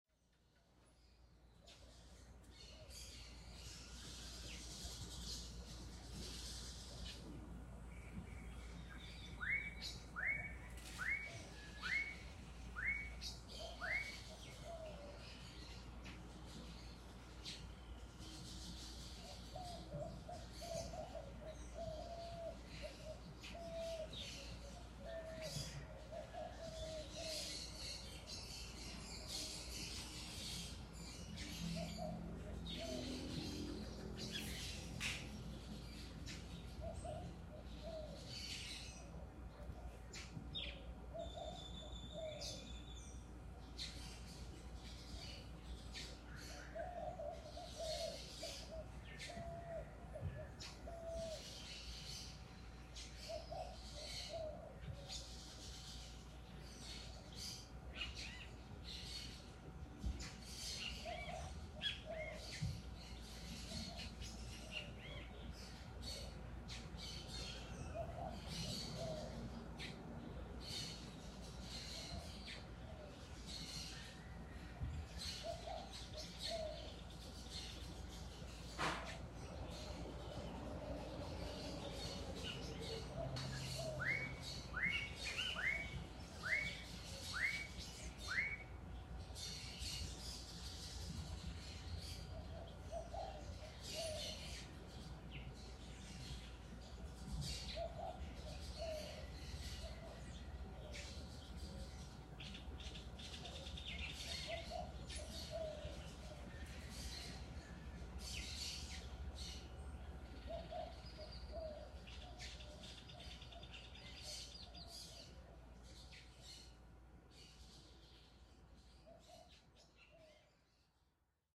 TropicalBirds-ElSalvador-EarlyMorning
Recorded early morning (5am) with iphone 11. Massaged a bit with Audition to clean up background ambient noise from street.